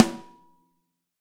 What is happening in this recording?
BRZ SNARE 003 - NOH
This sample pack contains real snare drum samples, each of which has two versions. The NOH ("No Overheads") mono version is just the close mics with processing and sometimes plugins. The WOH ("With Overheads") versions add the overhead mics of the kit to this.
These samples were recorded in the studio by five different drummers using several different snare drums in three different tracking rooms. The close mics are mostly a combination of Josephson e22S and Shure SM57 although Sennheiser MD421s, Beyer Dynamic M201s and Audio Technica ATM-250s were also used. Preamps were mainly NPNG and API although Neve, Amek and Millennia Media were also used. Compression was mostly Symetrix 501 and ART Levelar although Drawmer and Focusrite were also used. The overhead mics were mostly Lawson FET47s although Neumann TLM103s, AKG C414s and a C426B were also used.
close, drum, live, overheads, stereo